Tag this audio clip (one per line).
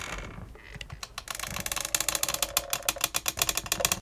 recording
Wood
field